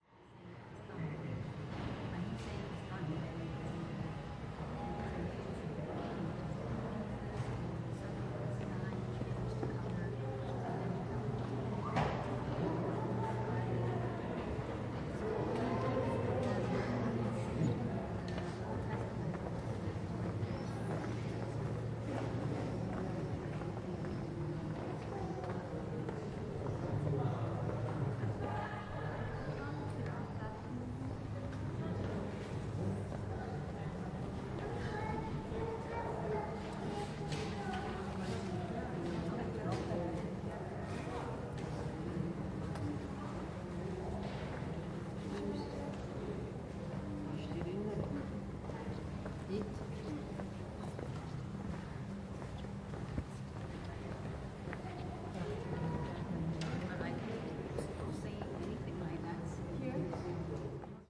Monastery yard with tourists
The yard of the monastery The Madonna del Sasso with tourists which speak in multiple languages.
Recorded in Ticino (Tessin), Switzerland.